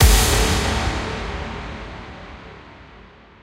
Brooklyn Impact
Heavy impact effect with resonating mid and high frequencies. This sample employs the full range of frequencies and uses multi-band stereo imaging effects.
fx, impact, electronic